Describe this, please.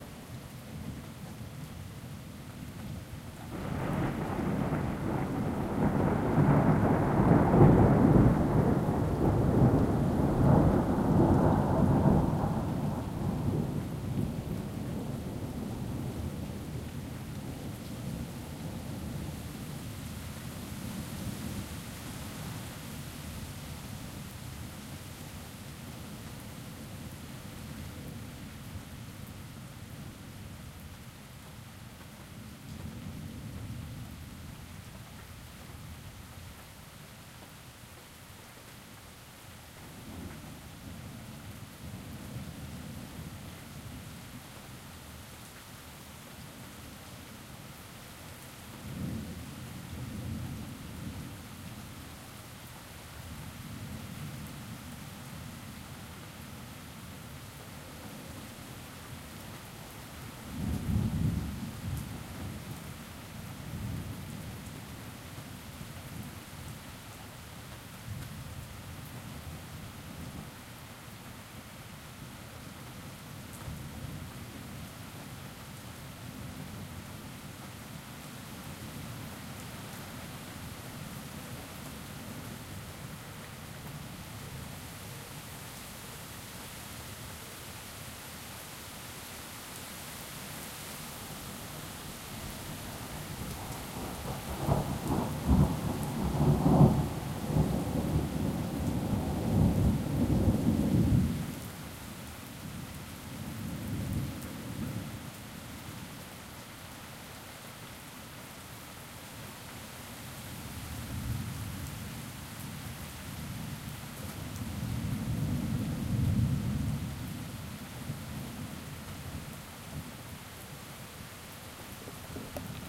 Storm in stereo
Piece of weather recorded with H2n